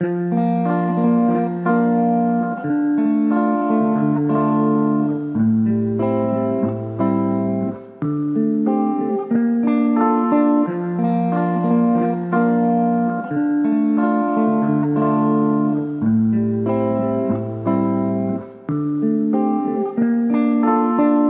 indie rockin'4
Please use it sample and make something good :-)
If you use this riff please write my name as a author of this sample. Thanks. 90bpm
mellow, atmosphere